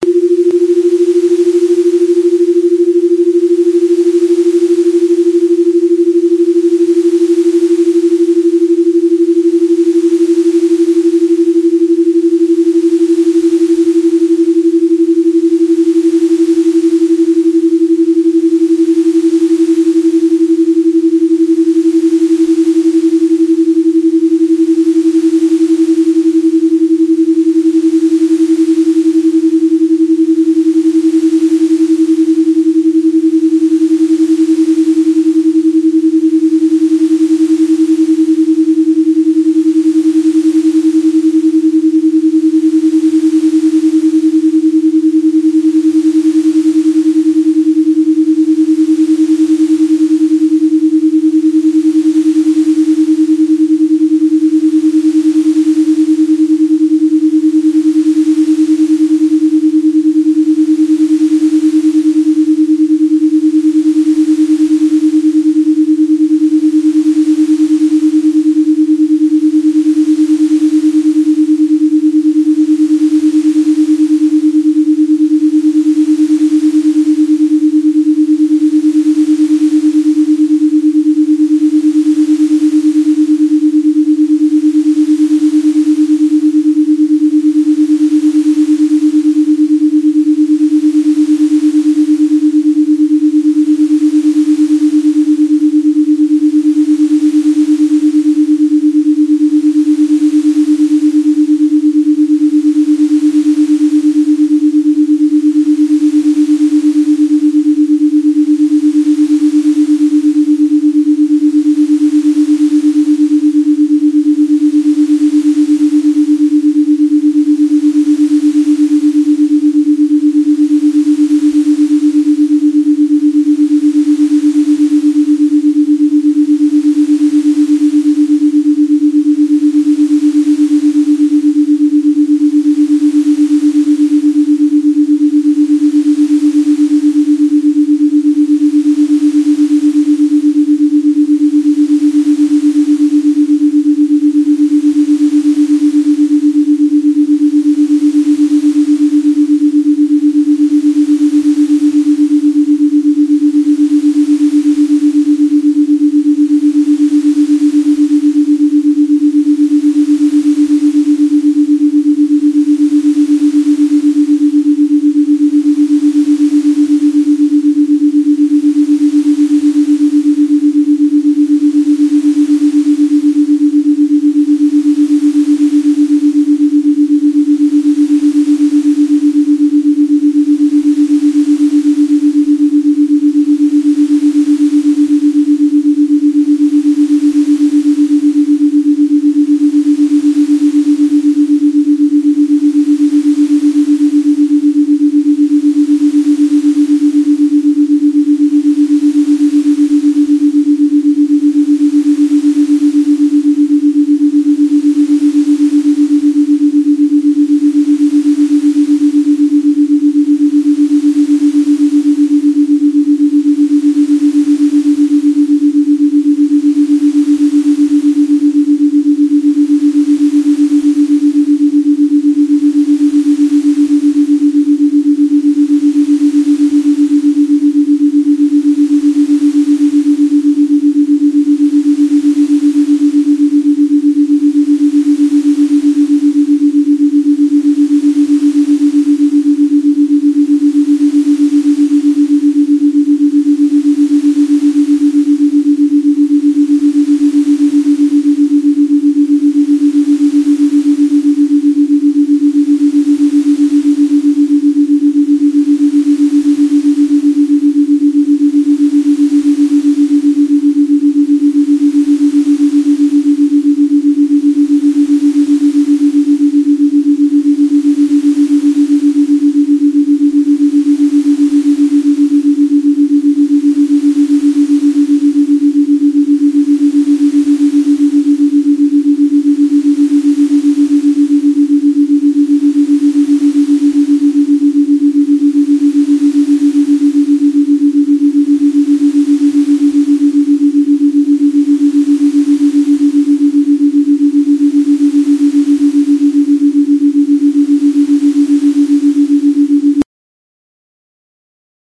Track ten of a custom session created with shareware and cool edit 96. These binaural beat encoded tracks gradually take you from a relaxing modes into creative thought and other targeted cycles. Binaural beats are the slight differences in frequencies that simulate the frequencies outside of our hearing range creating synchronization of the two hemispheres of the human brain. Should be listened to on headphones or it won't work.